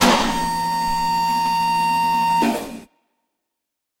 Estlack liftB 4
hydraulic lift, varying pitches
hydraulic, machine, machinery, mech, pneumatic, robot